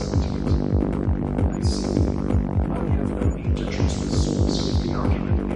Music Loop 2
One shots and stabs for techno experimental or electronic sounds. Some loops some sound shots.
sound, granular, experimental, groovy, design, improvised, electronic, rhythmic, techno, music, stabs, loop